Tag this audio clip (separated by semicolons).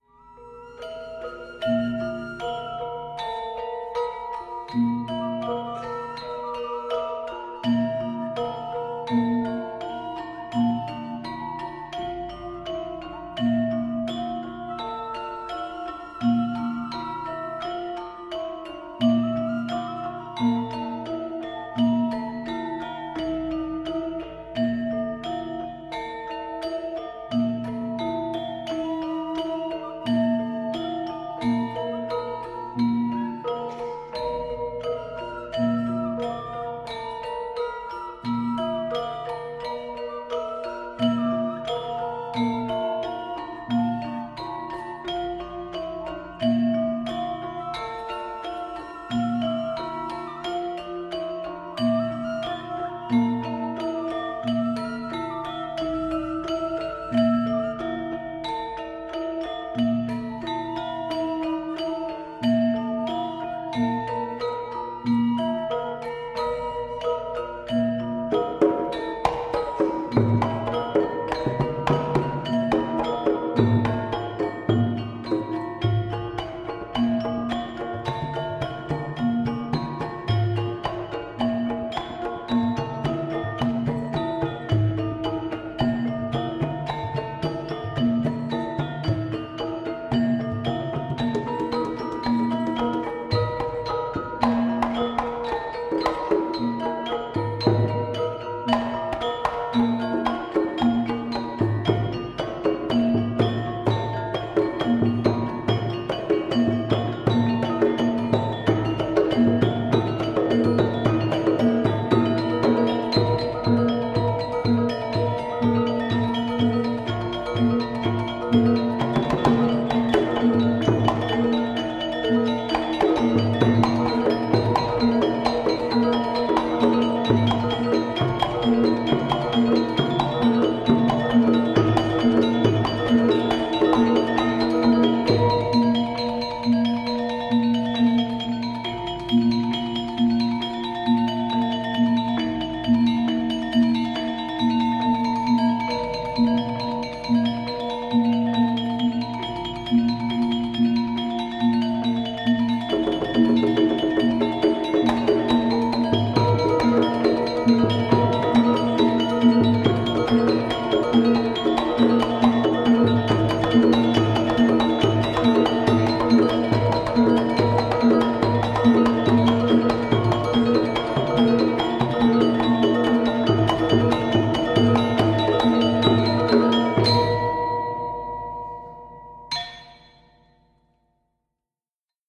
ceramics; Street; percussive; instrument; music; Indonesian; percussion; field-recording; jar